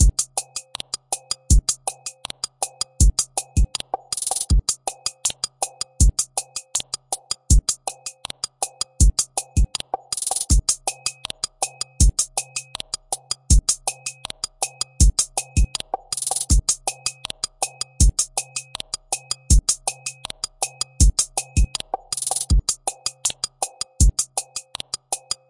A tick tockidy loop in 160bmp...